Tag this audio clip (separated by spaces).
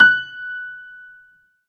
Upright-Piano Piano